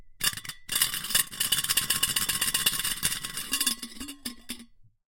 Metal water bottle - shaking lid
Shaking a metal water bottle to make the lid rattle.
Recorded with a RØDE NT3.
Hit, Shake, Thermos